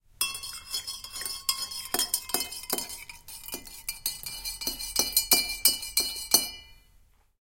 Stirring a cup of tea while pouring milk in.
field-recording, fx